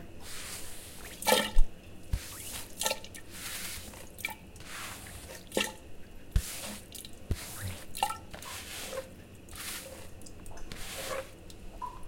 Water in drain
Watering going in drain
field-recording, shower, water